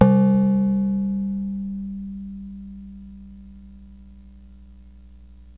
Fm Synth Tone 09
fm, portasound, pss-470, synth, yamaha